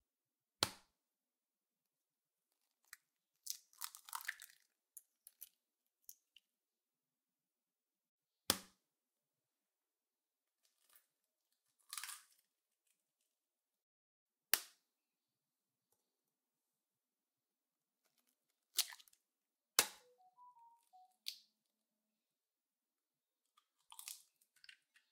cracking eggs into dry and wet ingredients and cracking them on a counter on a bowl and against each other